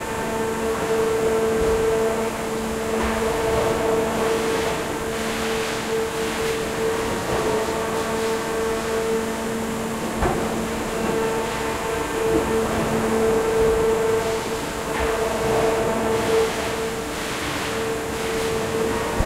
Field recording from Whirlpool factory in Wroclaw Poland. Big machines and soundscapes
Wroclaw, machines, Wroc, field, aw, Poland, Factory